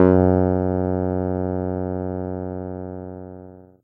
mt40 ep 054
casio mt40 el piano sound multisample in minor thirds. Root keys and ranges are written into the headers, so the set should auto map in most samplers.
digital, keyboard, multisampled, synthesised